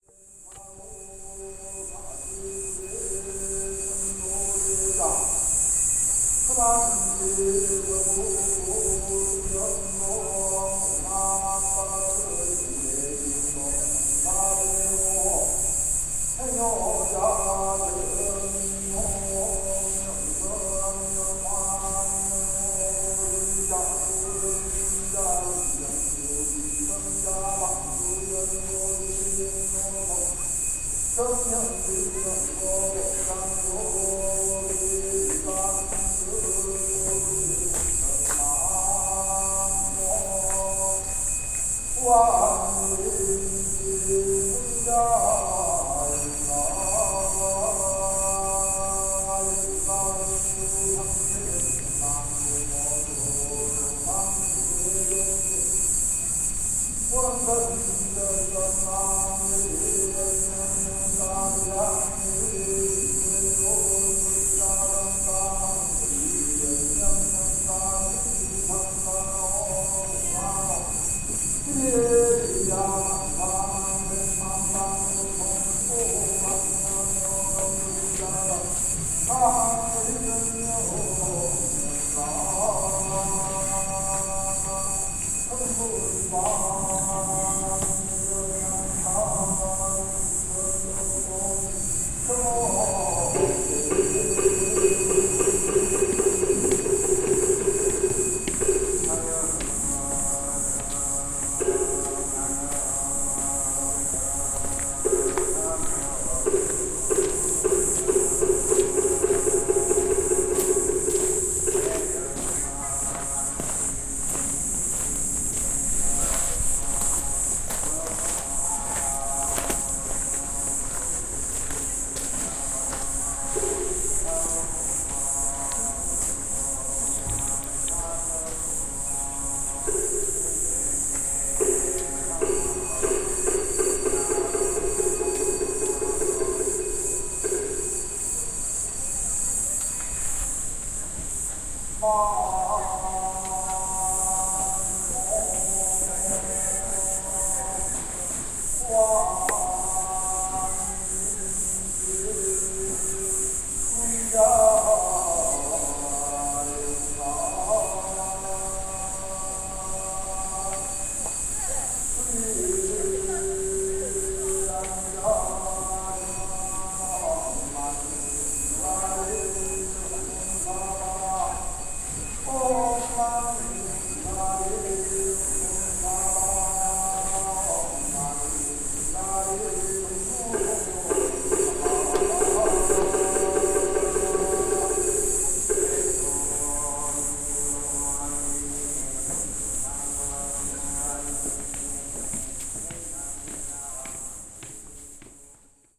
Recorded at Gakwonsa Temple, a Buddhist Temple in Cheonan, South Korea. The recording was made standing outside as monks chanted in the temple. The sound of cicadas can also be heard.